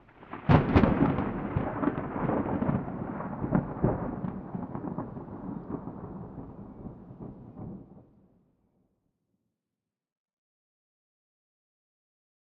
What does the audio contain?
balfron thunder F
Field-recording Thunder London England.
21st floor of balfron tower easter 2011
England, Thunder